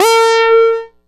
multi sample bass using bubblesound oscillator and dr octature filter with midi note name
sample, synth, bass, multi